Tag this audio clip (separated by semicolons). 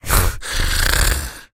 RPG; Speak; Talk; Vocal; Voice; Voices; arcade; creature; fantasy; game; gamedev; gamedeveloping; games; gaming; goblin; imp; indiedev; indiegamedev; kobold; minion; sfx; small-creature; videogame; videogames